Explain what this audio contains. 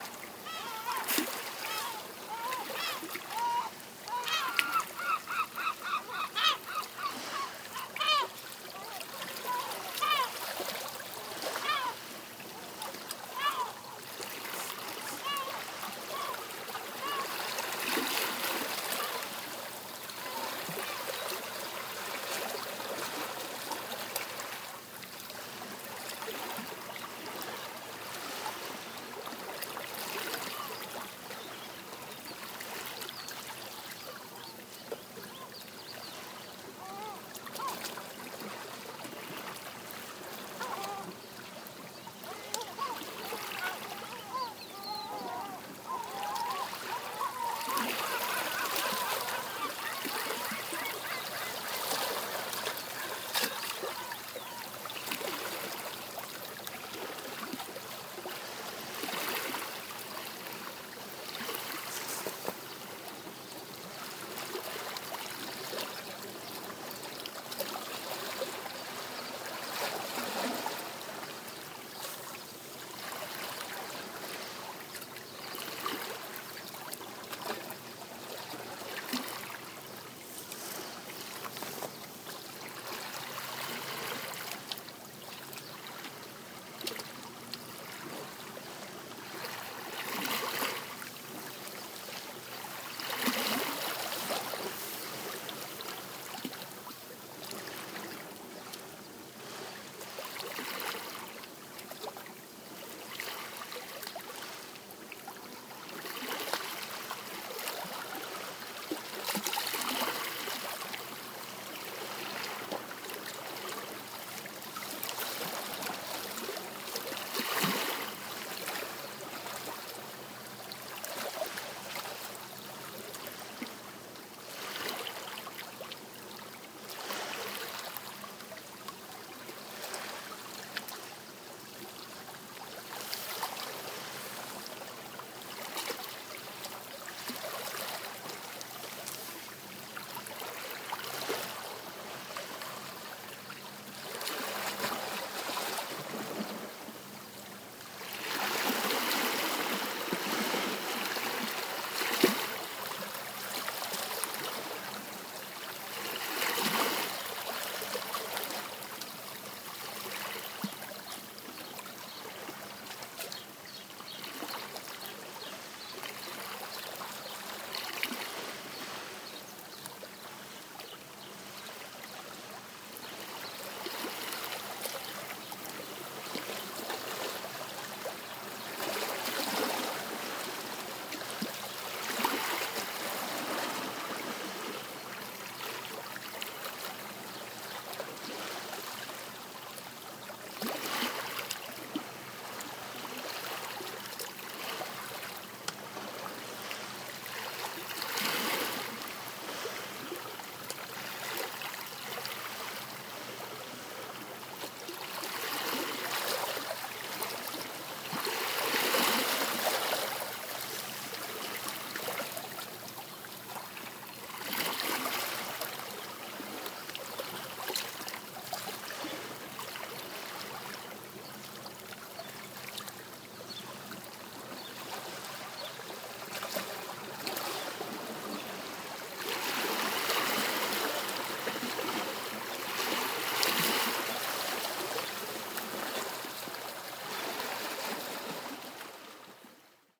A quiet seaside environment in my childhood city İzmir's Karaburun village. You may hear the seagulls in the distance.